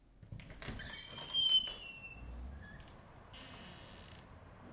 door opening creaky
A creaky front door opening.
creaky, front-door, door, opening